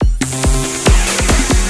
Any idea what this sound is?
are all part of the "ATTACK LOOP 6" sample package and belong together
as they are all variations on the same 1 measure 4/4 140 bpm drumloop. The loop has a techno-trance
feel. The first four loops (00 till 03) contain some variations of the
pure drumloop, where 00 is the most minimal and 03 the fullest. All
other variations add other sound effects, some of them being sounds
with a certain pitch, mostly C. These loop are suitable for your trance
and techno productions. They were created using the Waldorf Attack VSTi within Cubase SX. Mastering (EQ, Stereo Enhancer, Multi-Band expand/compress/limit, dither, fades at start and/or end) done within Wavelab.